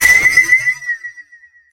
Rikochet V2 Medium 1
Bang, Blaster, Gunshot, Heavy, Laser, Rifle, Rikochet, SciFi, videgame